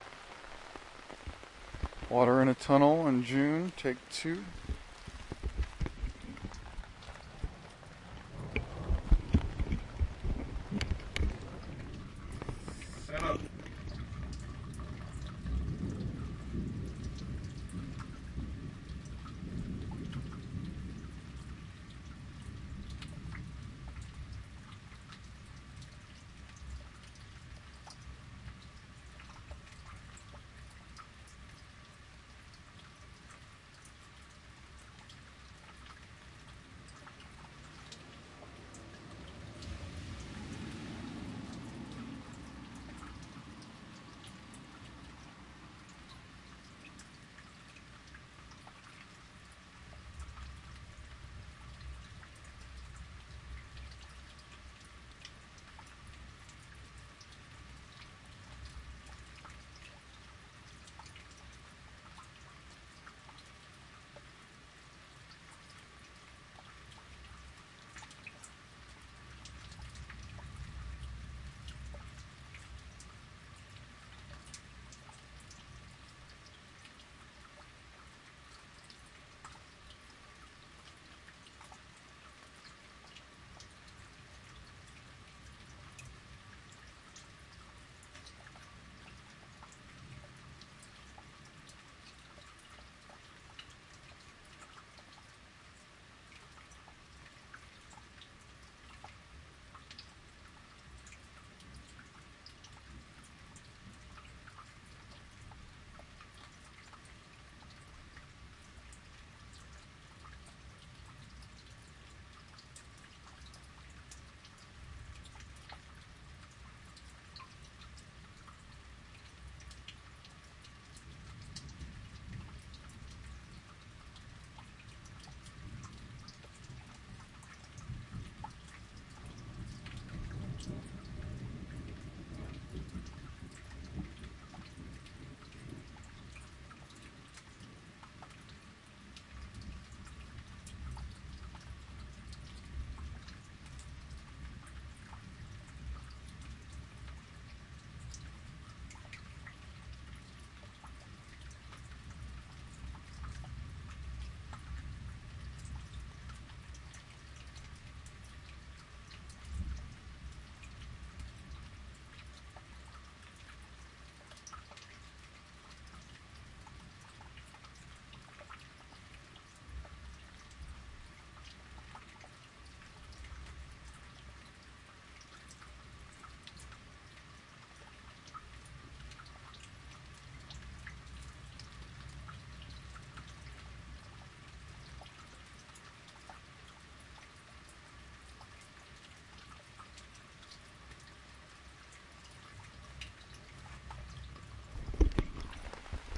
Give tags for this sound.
rain; stereo; tunnel; thunder; water

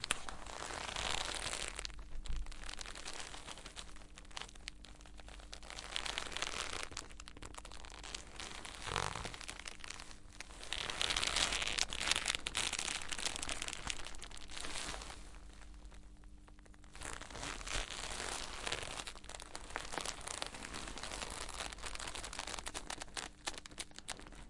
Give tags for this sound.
hang; leather; OWI; rope; stretch; tight; tightening; twist

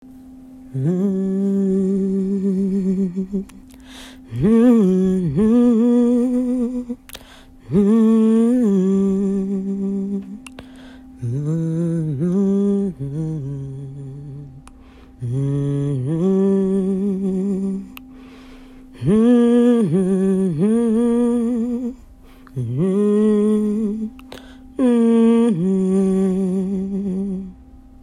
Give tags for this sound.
background-noise; hum; humming

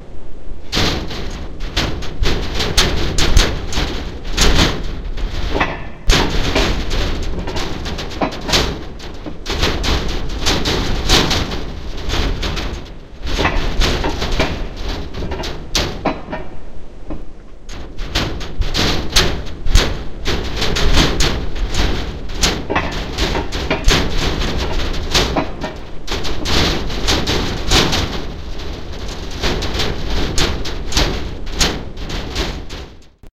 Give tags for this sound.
cage; metal; rattling

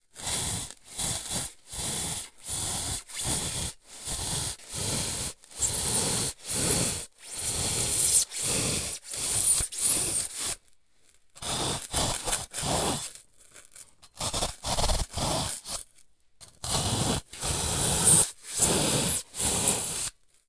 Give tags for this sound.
polystyrene; Panska; CZ; Czech; Pansk

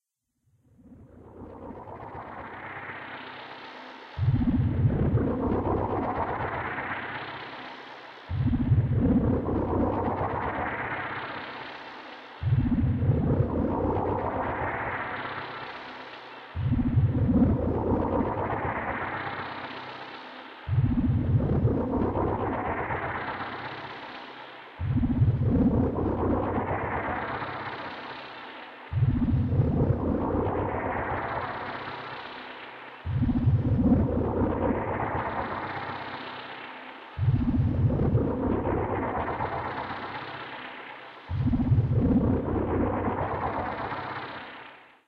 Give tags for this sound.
Alien; Electronic; Futuristic; Machines; Mechanical; Noise; Other; Sci-fi; Space; Spacecraft; Strange; world

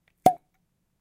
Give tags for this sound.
blopp; bottle; champagne; comic; cork; drink; flopp; fx; glass; liquid; open; opening; plopp; spirits; wine

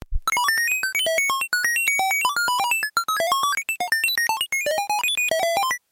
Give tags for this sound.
8-bit; 8bit; beep; beeping; beeps; computer; sfxr

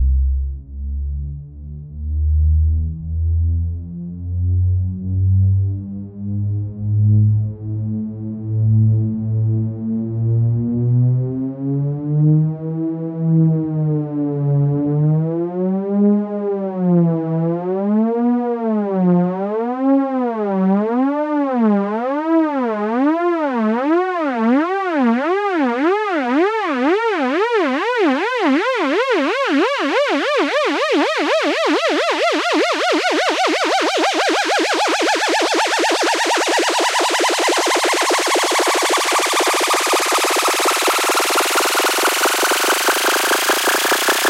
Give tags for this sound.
buildup; effect; fx; sfx; whoosh

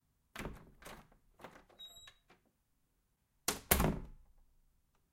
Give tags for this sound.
close; closing; creak; creaking; door; doors; open; opening; squeak; squeaky; wood; wooden

